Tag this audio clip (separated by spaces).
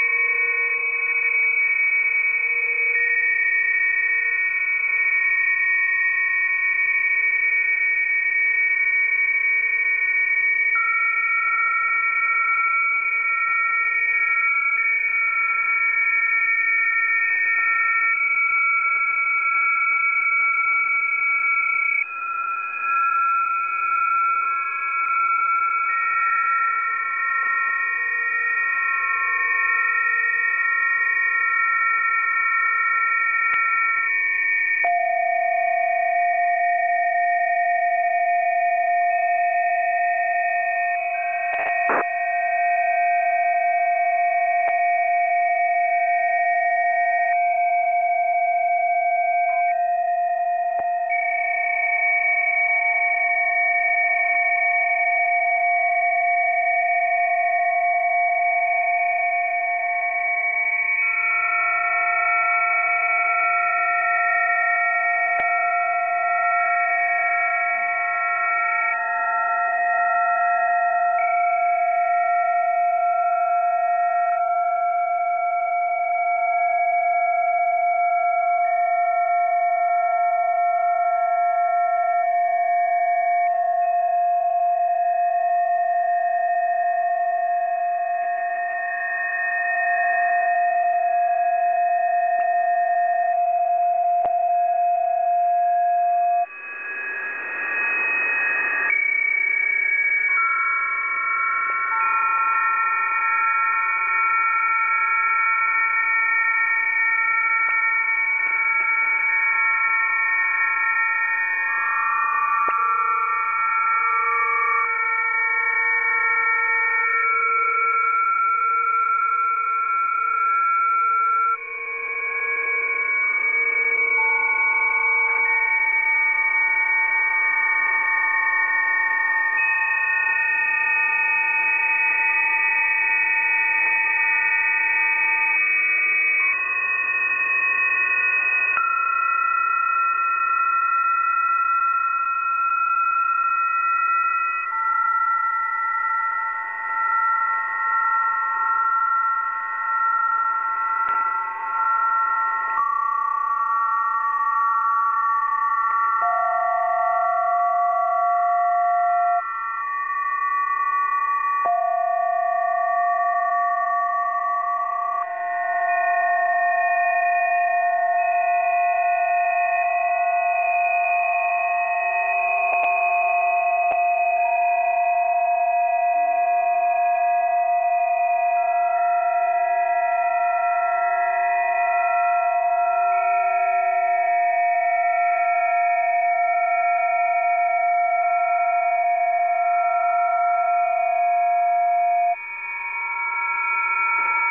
PSK radio shortwave static transmission USB voice